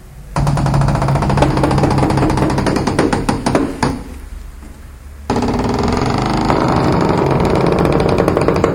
durys geros22
wooden door squeaks
wooden, squeaks, door